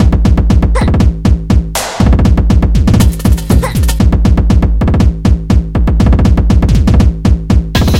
240bpm sc
a very simple hardcore loop done in hammerhead